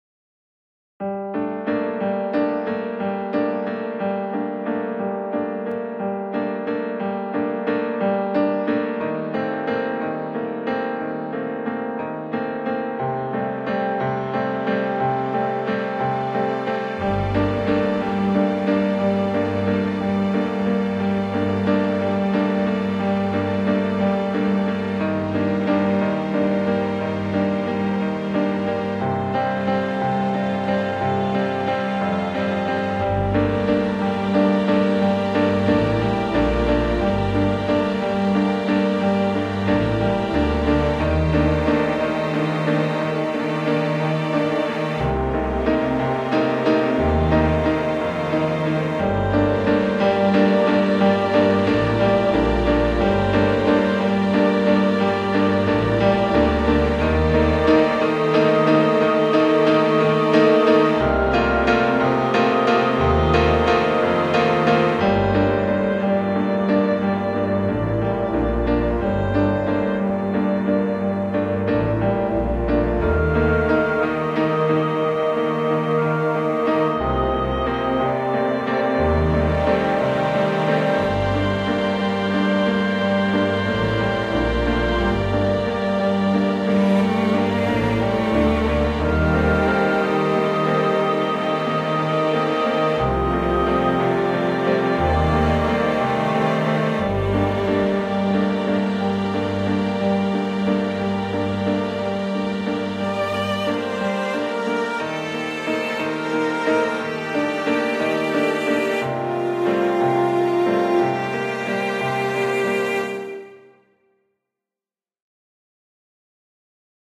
I've been using virtual instruments to compose that little piece.
Hope you like it and would be glad if you could send me a message once the project you used it for is released.